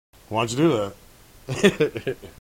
ps9 blooper
Vocal blooper taken from Potata Sonata Number 9.
edit, blooper, outtake